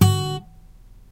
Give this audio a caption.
Plucked notes on a Yamaha Acoustic Guitar recorded with my faithful cheapo clip on condenser microphone (soon to be replaced). This will be the first in a new series of acoustic guitar chords. Check back for updates.
guitar, acoustic, finger-pick, pluck